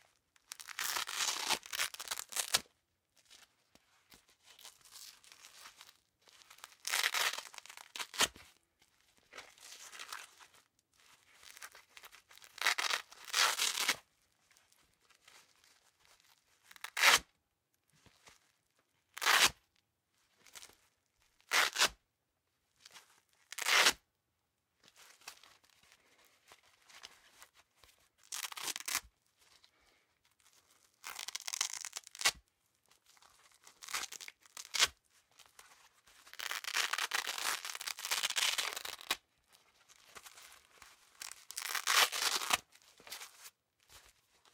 Pulling apart and handling a piece of Velcro. Mono recording from shotgun mic and solid state recorder.